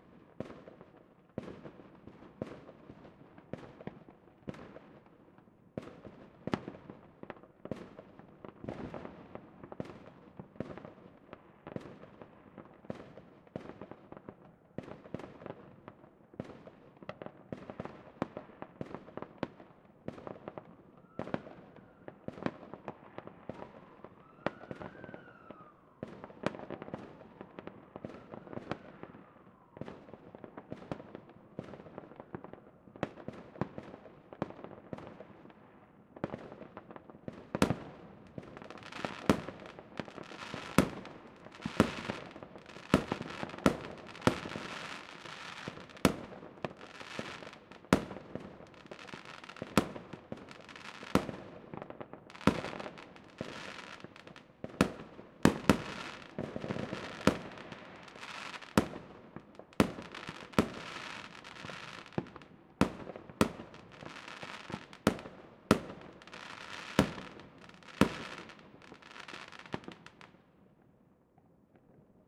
New year fireworks
firework, new